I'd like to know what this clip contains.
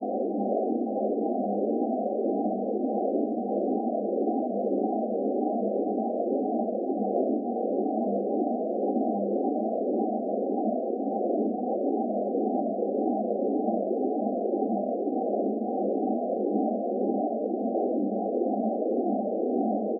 This is the space noise made with either coagula or the other freeware image synth I have, that you have been waiting for.